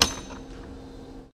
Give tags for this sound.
field-recording; machine; metallic; percussion

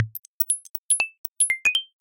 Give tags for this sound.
manyvoices; glassy; loop; fm; percussion; operator; synthetic; microsound; frequency-modulation

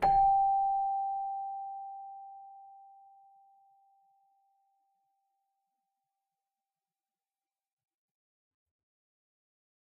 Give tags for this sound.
keyboard; bell; celesta; chimes

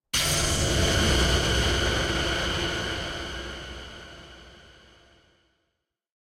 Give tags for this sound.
grimdark; rocket; missile; woosh; soulful

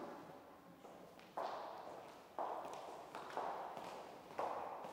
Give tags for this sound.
footsteps; garage; garagem; passos

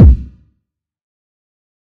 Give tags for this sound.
effect; club; trap; house; kick; pan; bounce; dub; glitch-hop; dubstep; fx; minimal

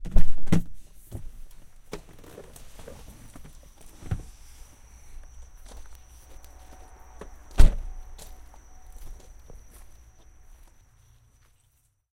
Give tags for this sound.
car; car-door; close; closed; closing; crickets; door; exterior; interior; night; night-time; open; opened; opening; recording; shut; slam; thud

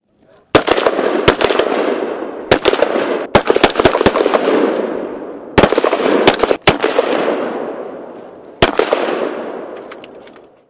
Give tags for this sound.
warfare; rifle; pistol; defense; shot; game-sound; weapon; gun; military; sniping; army; sniper; shooting; war; attack

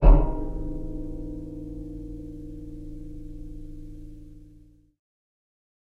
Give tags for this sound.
Bell; Cluster; Hit; Cello; Violoncello

